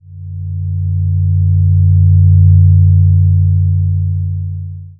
Retro Sci-Fi, horror 05
Playing around with amplitude modulation on a Doepfer A-100 modular synthesizer.
I used two A-110 oscillators.
Spring reverb from the Doepfer A-199 module.
Recorded with a Zoom H-5 in March 2016.
Edited in ocenaudio.